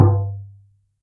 this is a pack of 92 acoustic percussion samples, made from various instruments sitting unused at my house, djembes, darabouka, maracas, composite pipe, pvc, cardboard and wooden scraps, they are all simply recorded with a cheap sm57 clone from t.bone using ardour, there is no special editing made except for som noise removal in audacity.